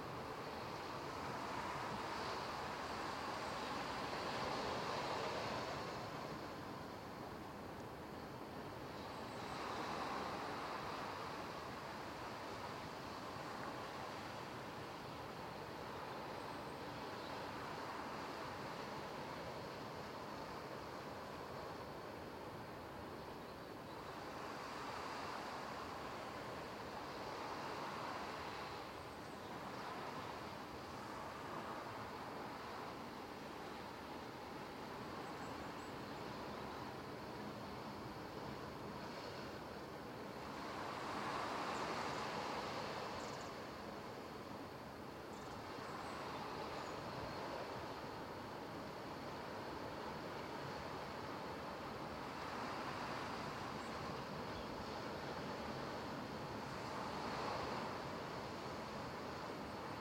A BARDA BEACH AB 2M
Short recordings made in an emblematic stretch of Galician coastline located in the province of A Coruña (Spain):The Coast of Dead
north-coast, ocean, sea